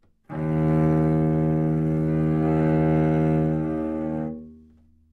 overall quality of single note - cello - D#2
Part of the Good-sounds dataset of monophonic instrumental sounds.
instrument::cello
note::Dsharp
octave::2
midi note::27
good-sounds-id::2071
Intentionally played as an example of bad-dynamics-errors
Dsharp2, multisample, single-note, cello, good-sounds, neumann-U87